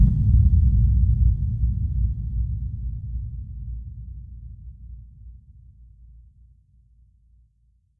SUBSONIC RUMBLE
Very low frequency rumbling boom
low, rumble, subsonic, boom, vlf, frequency